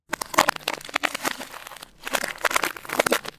Ice 7 - reverse
Derived From a Wildtrack whilst recording some ambiences